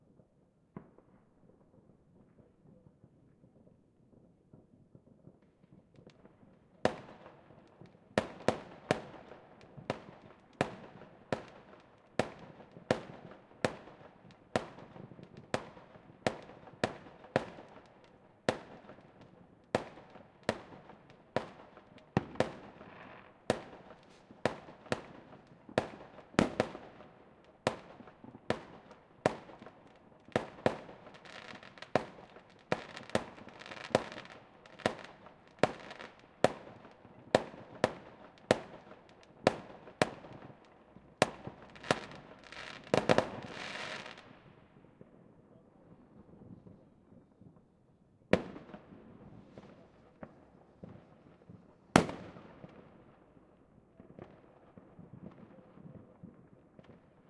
New year fireworks
background, firework, new, year